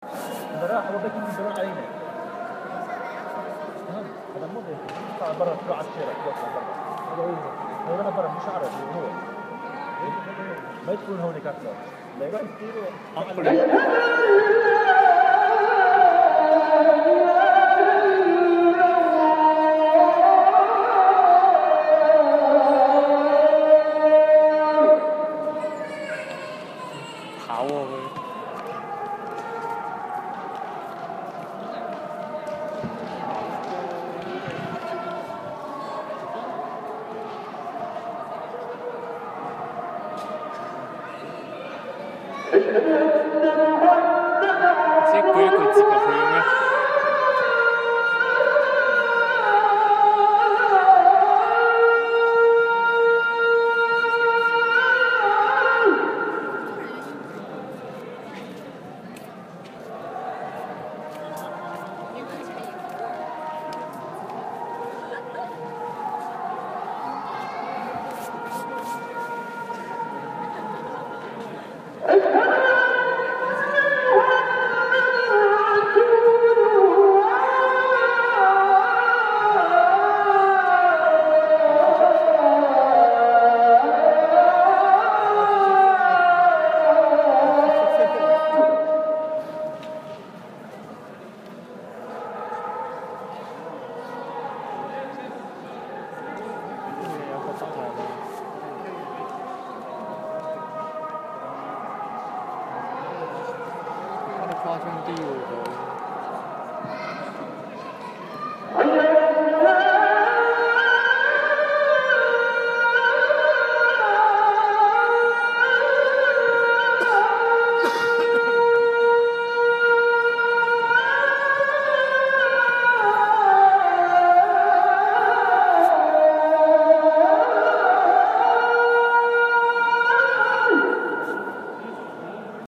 field-recording at Blue Mosque in Istanbul, Turkey